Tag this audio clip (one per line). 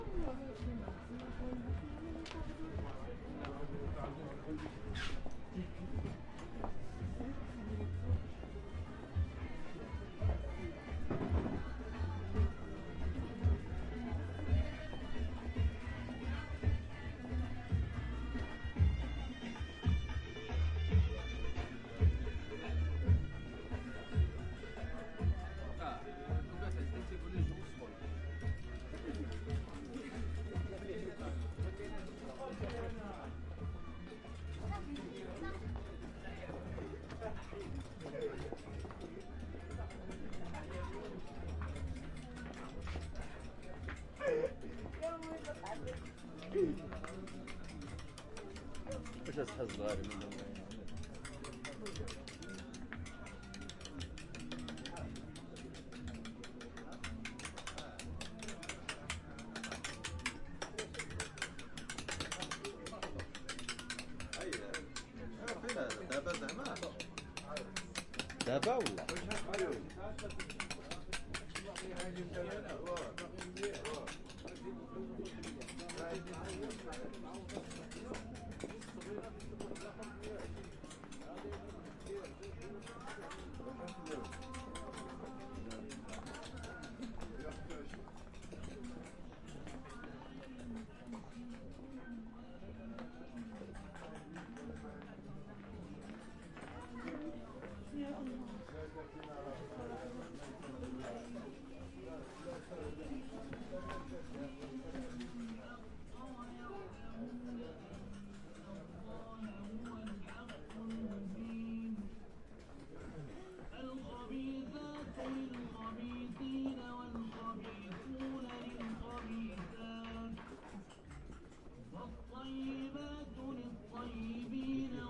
bazaar
crowd
morocco
oriental
street